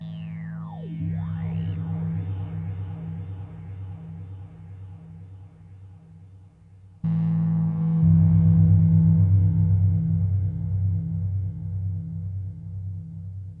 abduction bass
Short one hit Juno 60 bass
bassline, juno